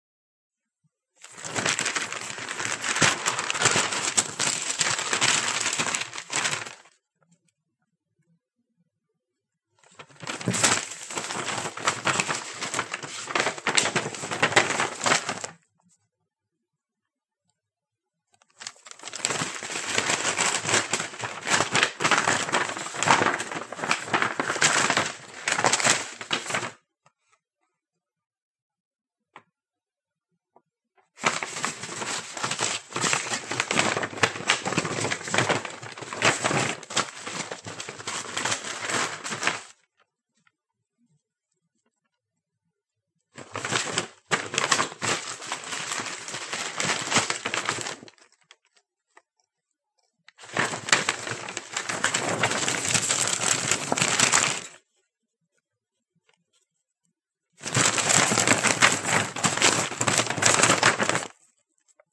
Leafing through papers and magazines quickly as if searching form something. Multiple options of varying lengths.
Magazine, foley